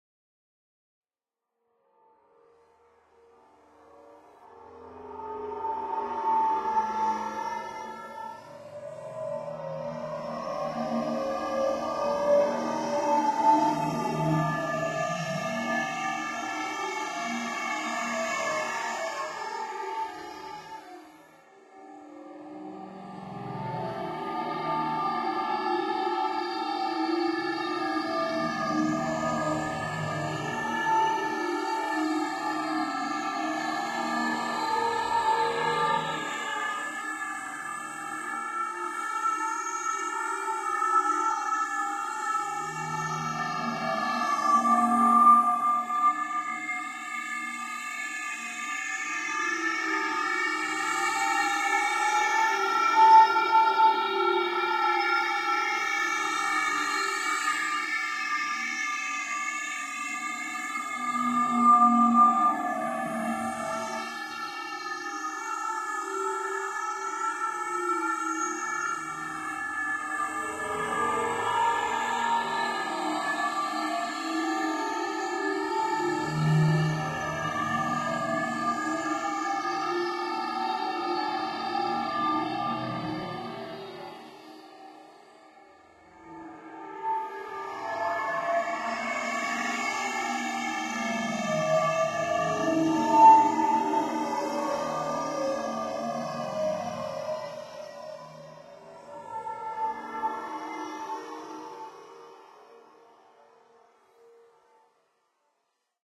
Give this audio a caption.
06 chant bunker (04+05 combo)
strange sound design, . Fifth step of processing of the bunker singing sample in Ableton. recorded simultaneously the two previous samples (for more power and variations).